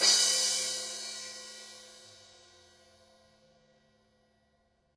Crash4MS
Files labeled "MS" correspond to Mid/Side recordings. This allows for adjustment and separation of the stereo image. To makes the most of these samples, I suggest you do, or the stereoness could sound exaggerated. Most DAWs and audio editors have this capability, or you can use any of several free applications, such as Voxengo's MSED (set to "inline" mode).
The pack variations correspond to different recording techniques and microphone combinations.